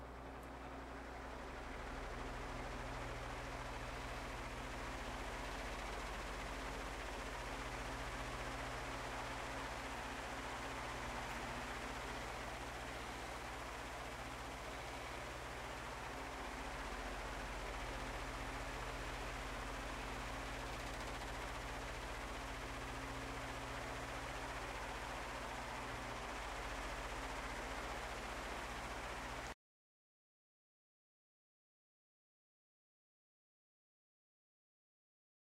The humming sound of a fan inside
fan, hum, inside